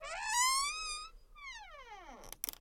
Door Creeking open hinge rusty
a door opening with a rusty squeaky hinge
close, closing, creaking, door, opening, rusty, squeaky, Wooden